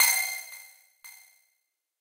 Delayed melodic mallet highpassed 115 bpm C6
This sample is part of the "K5005 multisample 03 Delayed melodic mallet highpassed 115 bpm"
sample pack. It is a multisample to import into your favorite sampler.
It is a short electronic sound with some delay on it at 115 bpm.
The sound is a little overdriven and consists mainly of higher
frequencies. In the sample pack there are 16 samples evenly spread
across 5 octaves (C1 till C6). The note in the sample name (C, E or G#)
does indicate the pitch of the sound. The sound was created with the
K5005 ensemble from the user library of Reaktor. After that normalizing and fades were applied within Cubase SX.
delayed, electronic, mallet, multisample, reaktor